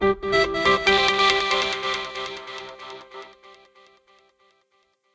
Happy bouncey guitar audio logo (artxmpl-al-03) high
A unique sound processing technology was used to create this audio logo. It makes the sound more soulful, melodic and pleasant for the listener.
Put this text in the description/credits:
Note that audio quality is good only when downloaded.
artxmpl, audio-logo, transition